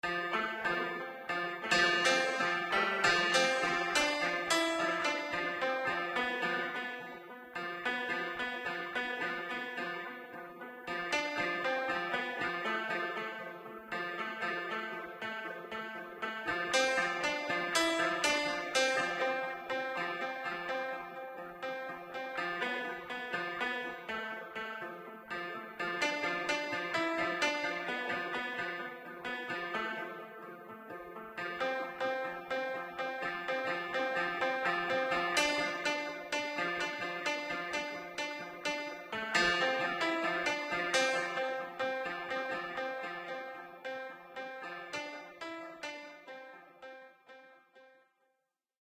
short piano piece played in Garagband. which i then added some reverb to with Argeiphontes Lyre by Tom Erbe.